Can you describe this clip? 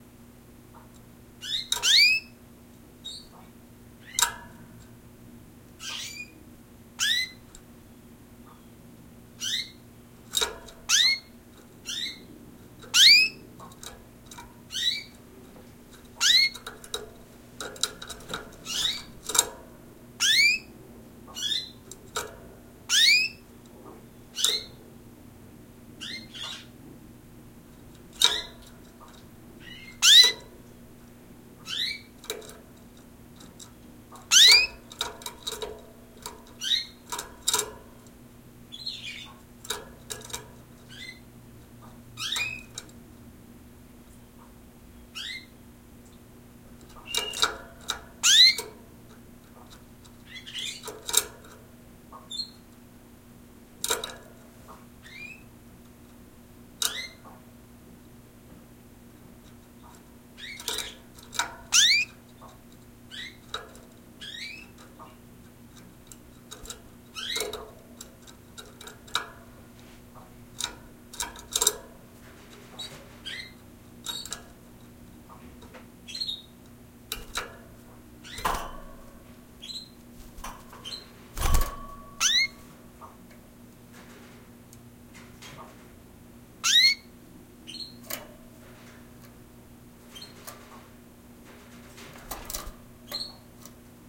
A coincident pair of mics placed next to a cage the mics were Electro Voice RE510 through a Shure FP24 into a Tascam HDP2.
I cannot get the stinking birds to really sing, yet they
do it normally and drive me crazy. Some of them may die today.
electro, canary, coincident, re510, tweet, voice, fp24, chirps, bird